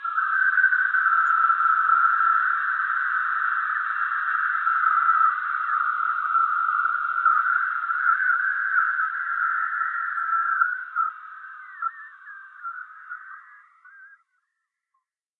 Unscreamed, vol. 5
I was too late for Halloween... Too late, do you get it?! :P
But enjoy the darkness once more.
(No one did suffer. :P)
spooky, horror, weird, scream, thrill, creepy, macabre, scary